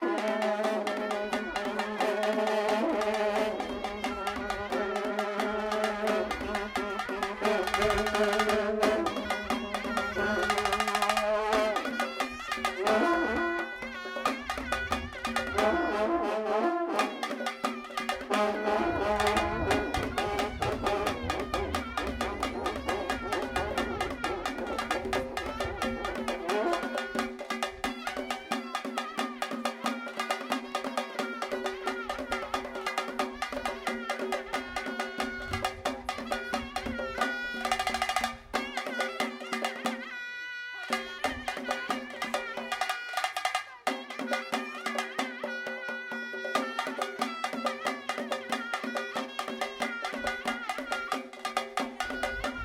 Zoom h1.
September 2019.
Tashkent (Uzbekistan).
National traditional music.
Kazakhstan, asia, Tashkent, music, Iran, Tajikistan, surnai, Kernei, ethnic, trumpet, Middle, Uzbekistan, national, Karnay